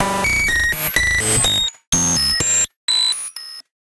FuzzGroove 125bpm03 LoopCache AbstractPercussion
Abstract Percussion Loop made from field recorded found sounds